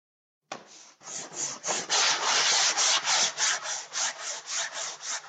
saw cutting wood
Just A saw noise made with a staple and a pizza box :)
basic-waveform, saw, saw-wave, waveform